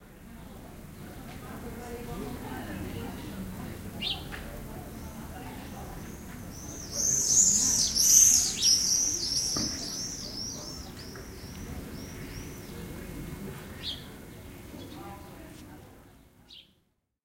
Swifts fly down the narrow streets of a French village, their screeching emphasised by the high walls of the old houses. In the background can be heard quiet voices as well as a sparrow.